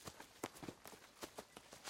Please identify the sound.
Three Kids Running Footsteps
Three kids running in the same direction outside.
footsteps, Running, Three-Kids